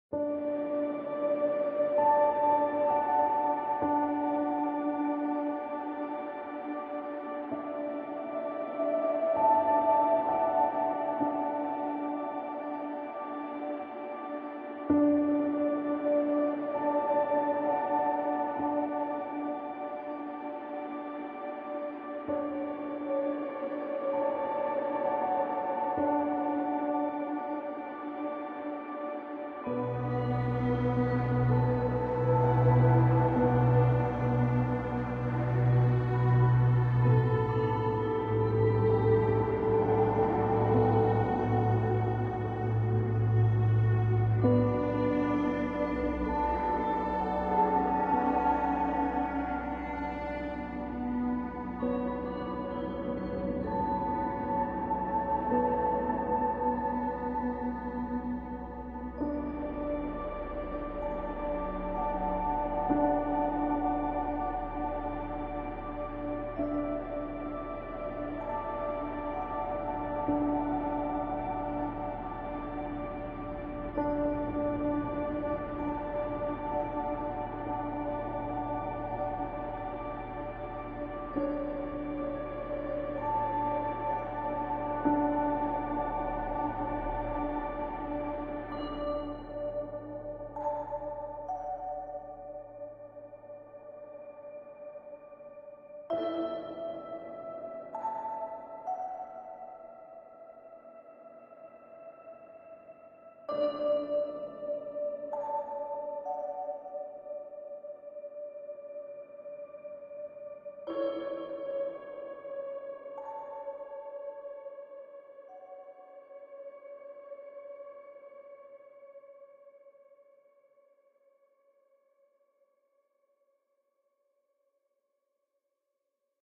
Emotional Music
Genre: Abandoned Land.
Track: 70/100
Emotional washed pads.
Pads, Drama, Emotional, Piano, Synth